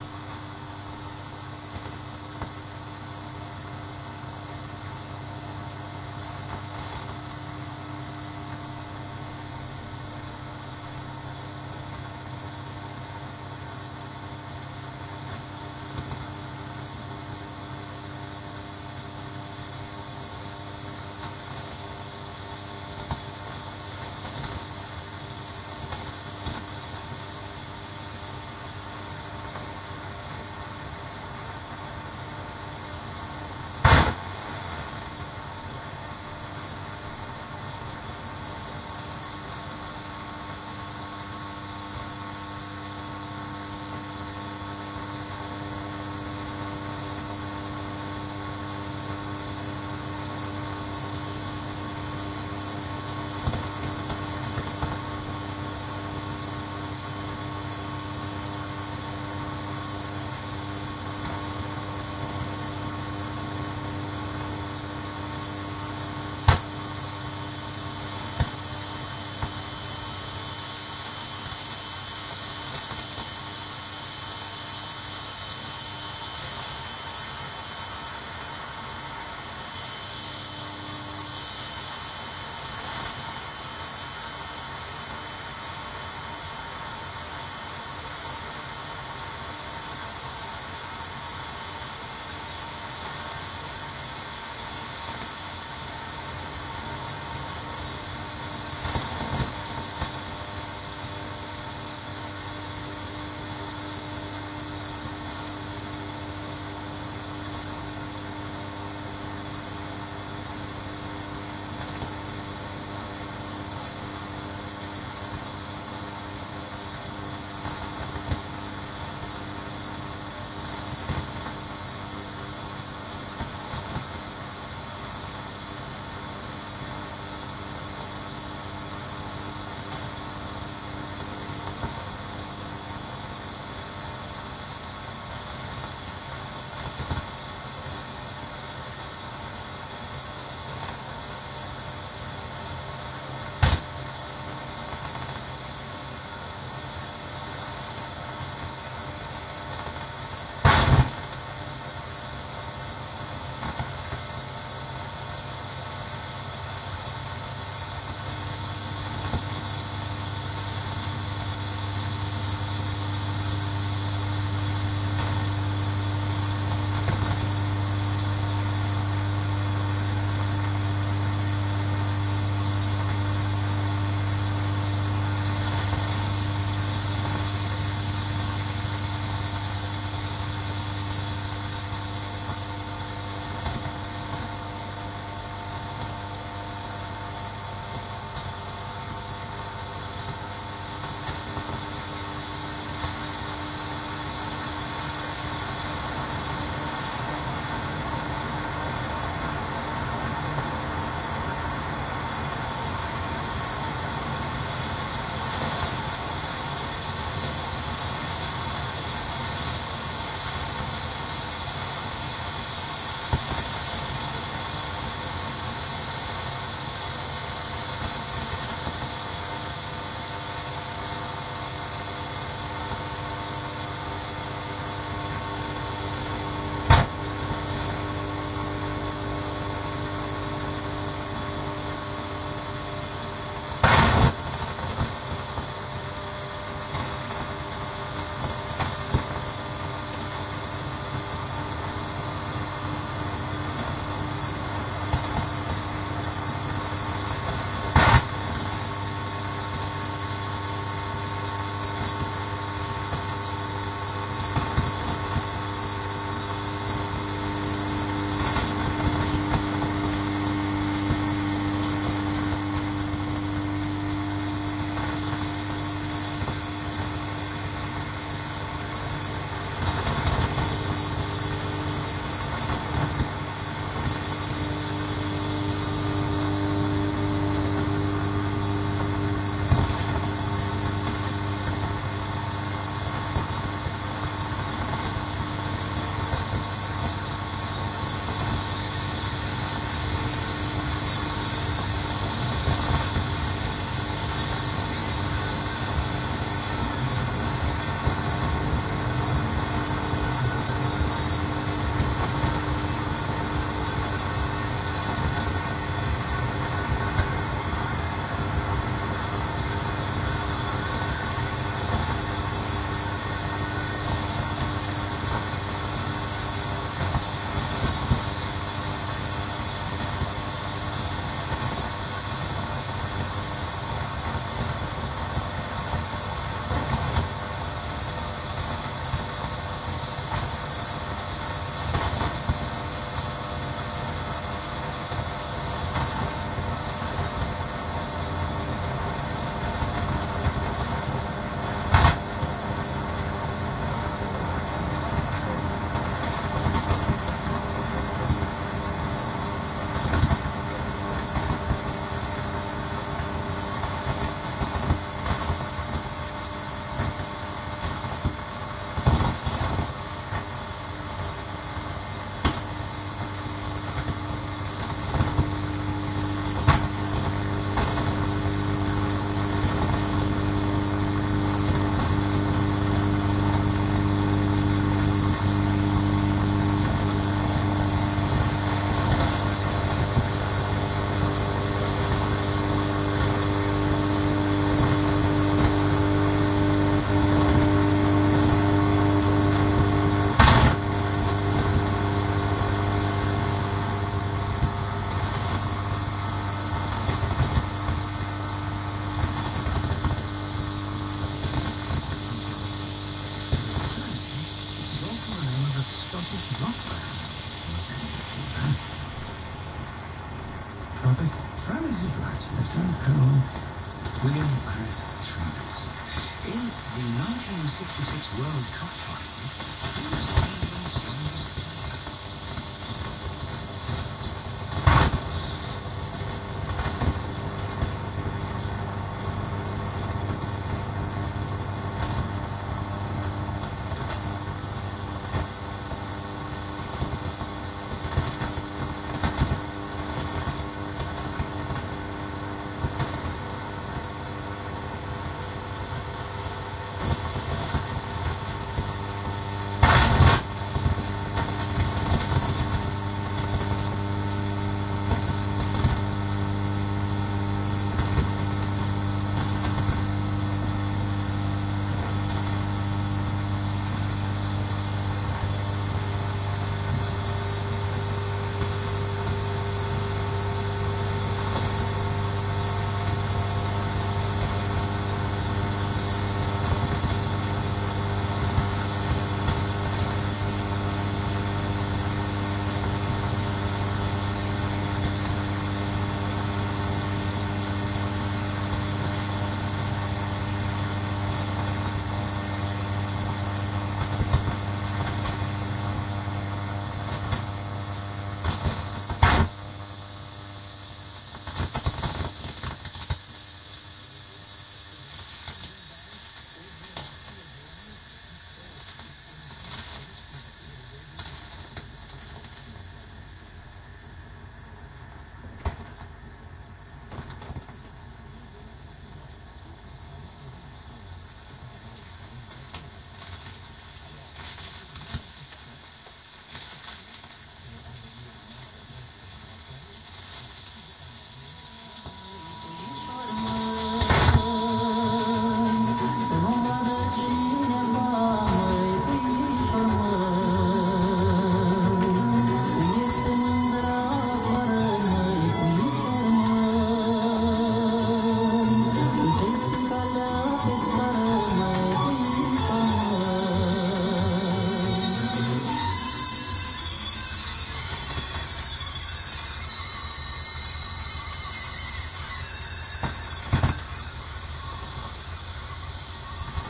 tube radio shortwave longwave noise interference lightning strikes
Listening to the shortwave during a thunder storm, you can hear the lightning strikes introduce short static noises to the signal, captured on an old tube radio with a long antenna.